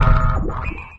STAB 032 mastered 16 bit
A short electronic spacy effect lasting exactly 1 second. Created with Metaphysical Function from Native
Instruments. Further edited using Cubase SX and mastered using Wavelab.
electronic, spacey